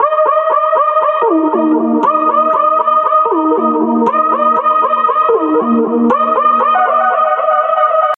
Long Way 2 Go
synth, siren, pad